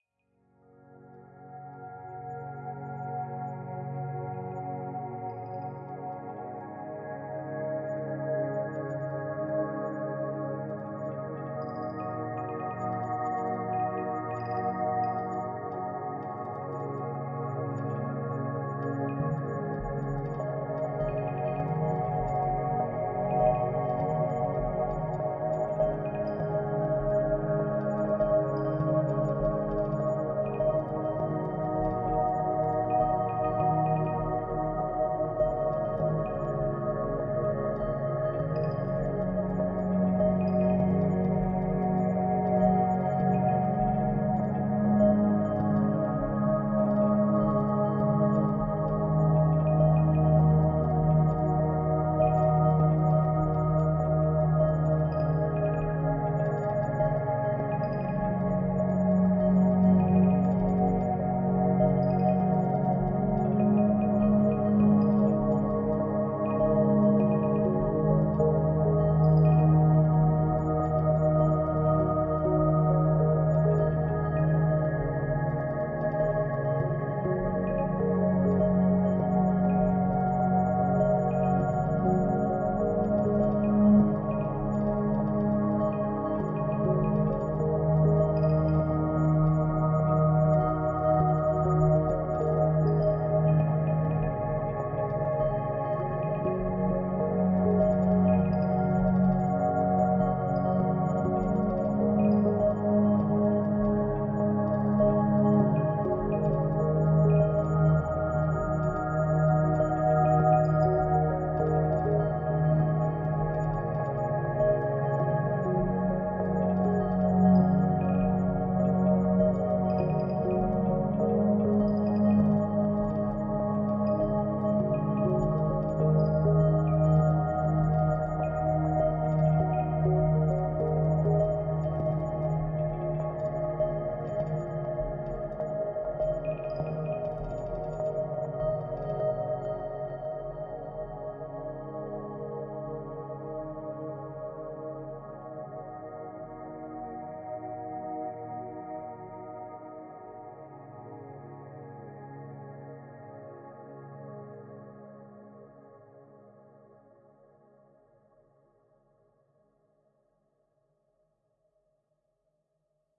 This is is a track with nice sound. It can be perfectly used in cinematic projects. Light and beautiful pad.
Regards, Andrew.
melodic, relax, instrumental, atmospheric, downtempo, ambient, classical, drone, emotional, space, electronic, chillout, deep, chill, music, experimental